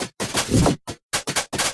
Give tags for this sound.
abstract digital effect electric electronic freaky future fx glitch lo-fi loop machine noise sci-fi sfx sound sound-design sounddesign soundeffect strange weird

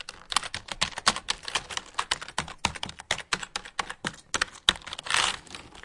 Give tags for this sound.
SonicSnaps Germany Essen January2013

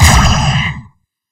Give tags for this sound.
science-fiction fantasy

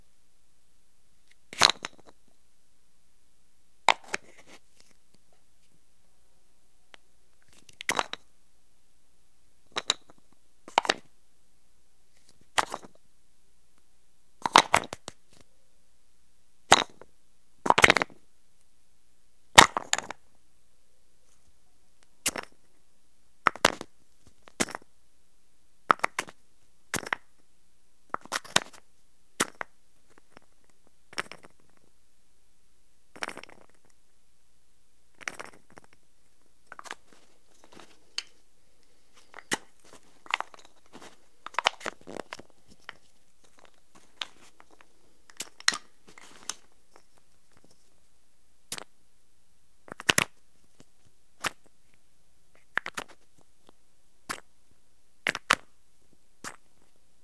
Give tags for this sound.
russian-doll open matrushka matryoshka wood cork rattle

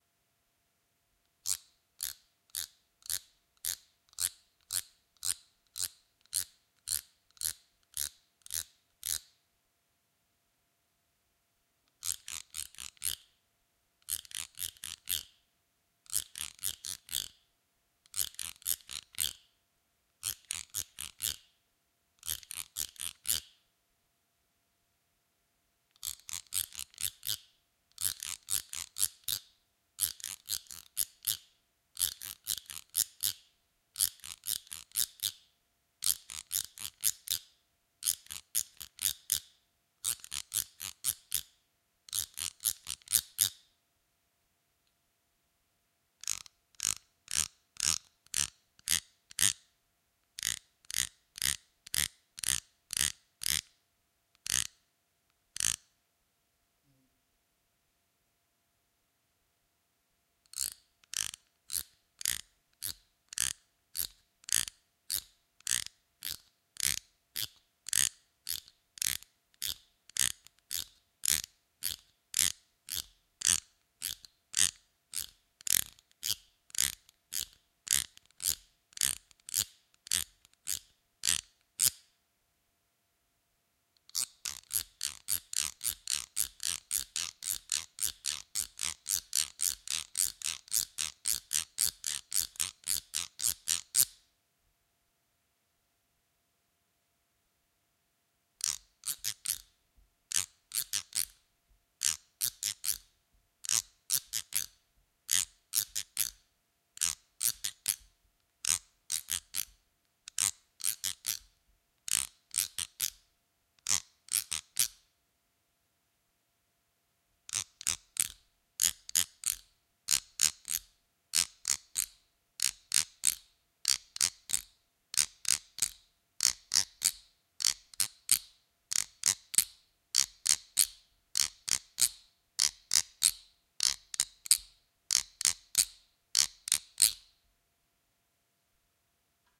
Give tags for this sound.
latin percussion scratch wood guiro brasil percussive wooden

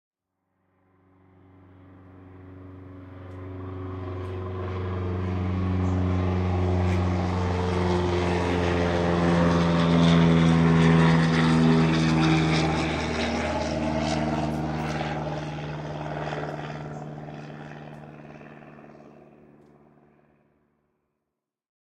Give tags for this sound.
plane aeroplane

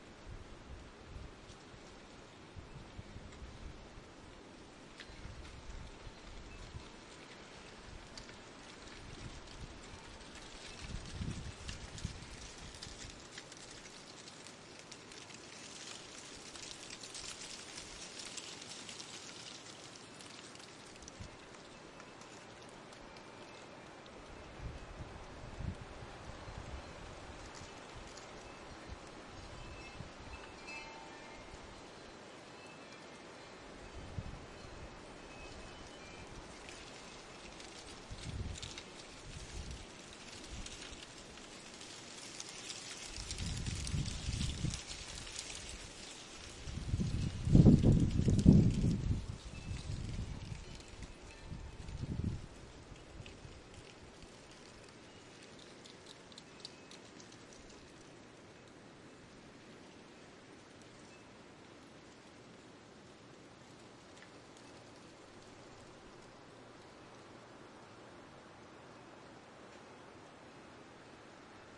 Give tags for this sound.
ambience December Leaves nature Chimes desolate peaceful Serenity Wind field-recording

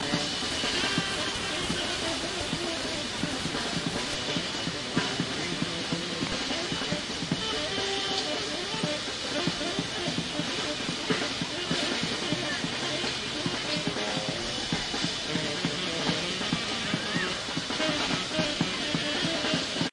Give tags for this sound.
fountain jazz

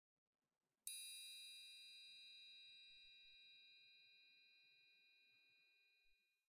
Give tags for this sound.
hit triangle

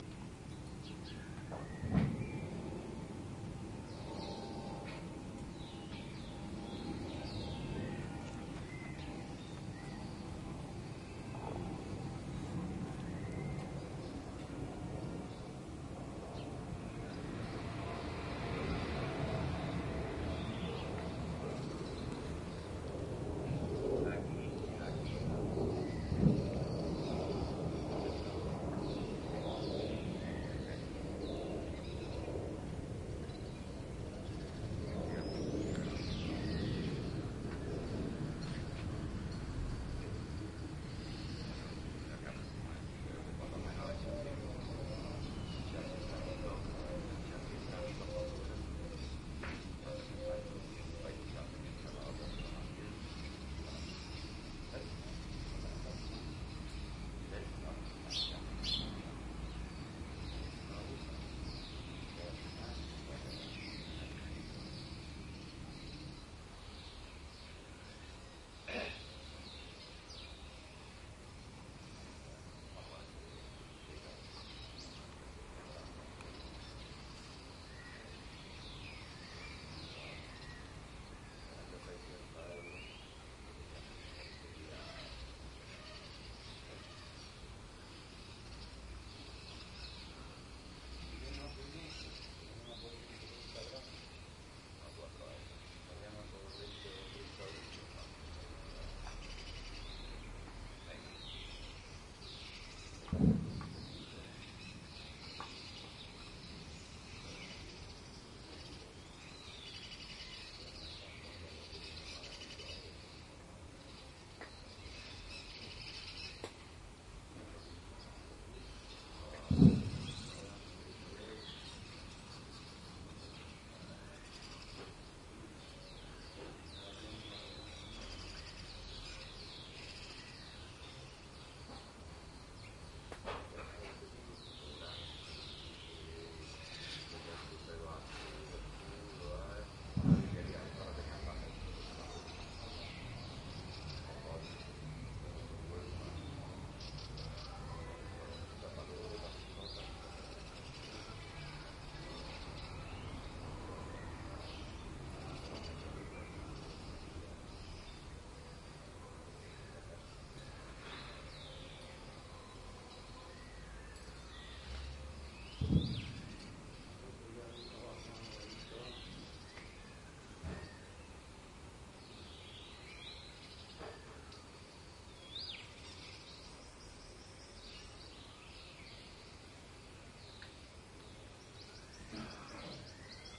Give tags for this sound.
field-recording south-spain spanish

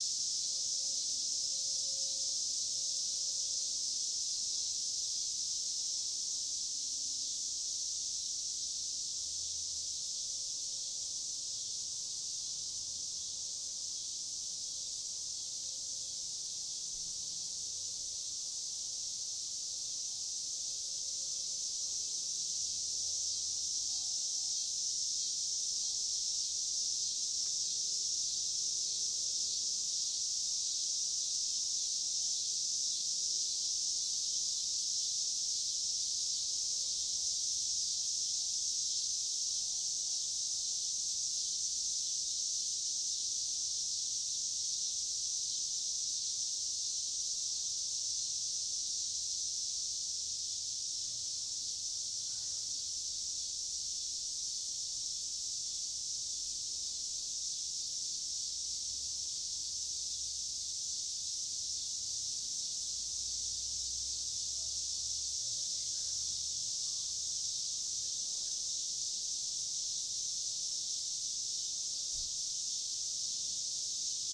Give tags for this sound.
Central-Illinois cicadas cicadas-singing Midwest summer

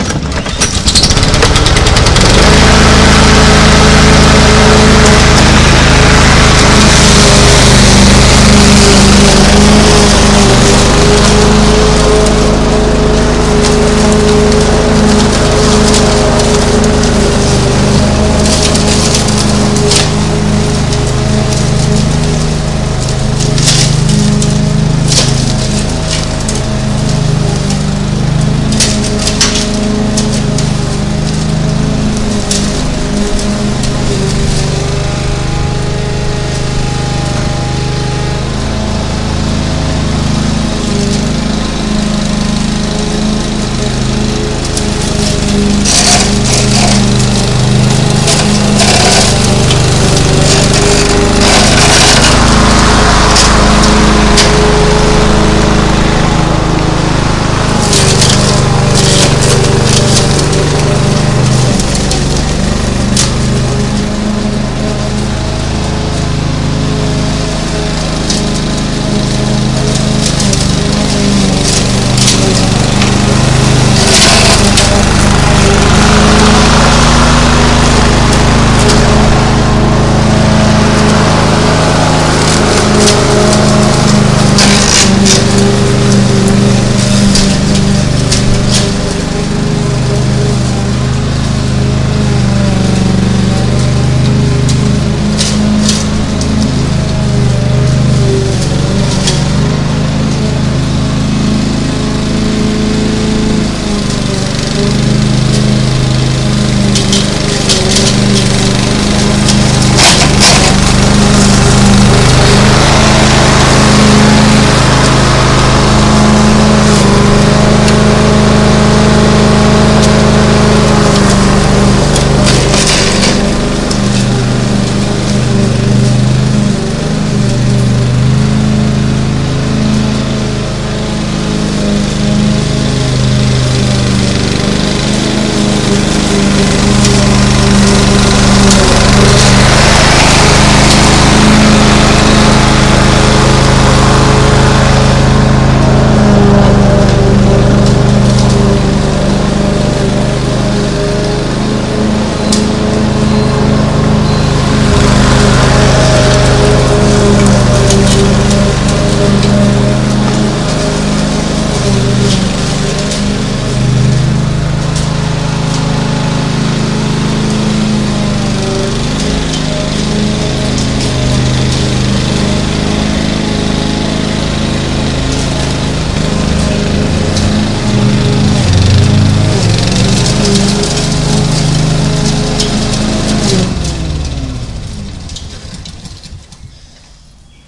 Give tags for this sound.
Samson-Go-Mic Lawnmower Mowing

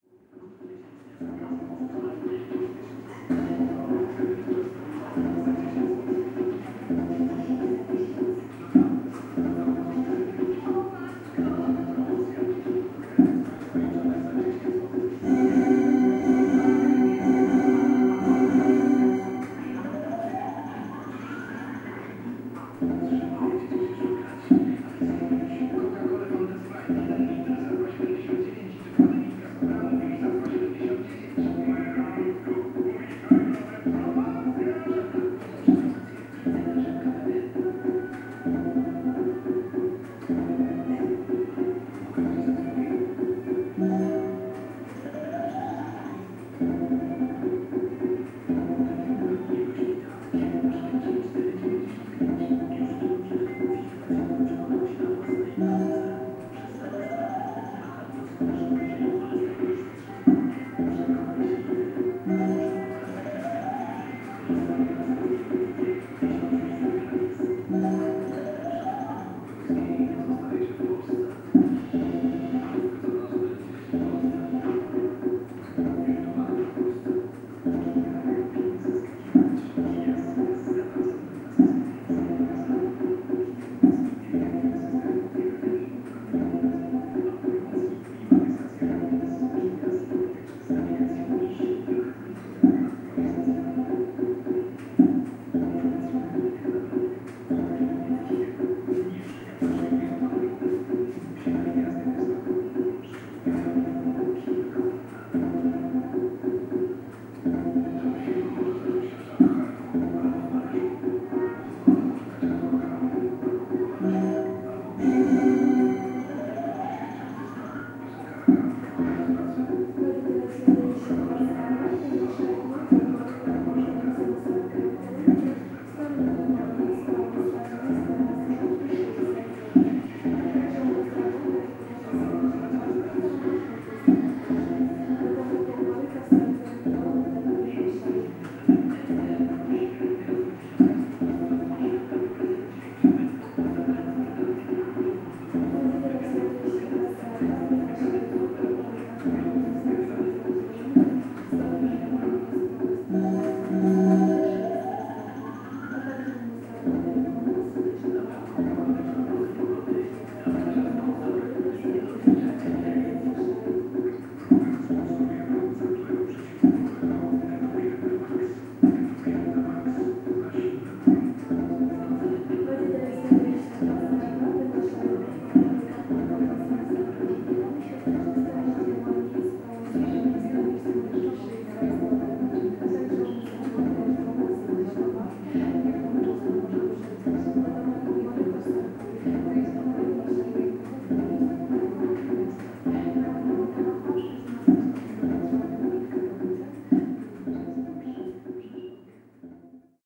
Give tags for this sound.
fieldrecording poland koryta road lubusz machine slotmachine bar roadside gambling

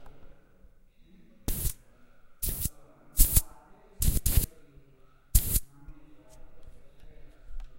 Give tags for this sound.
bell bergamo bicycle bike human race

